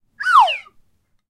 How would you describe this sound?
Slide Whistle, Descending, A
Raw audio of a plastic slide whistle descending in pitch. The whistle was about 30cm away from the recorder.
An example of how you might credit is by putting this in the description/credits:
The sound was recorded using a "H1 Zoom recorder" on 17th September 2017.
whistle, comical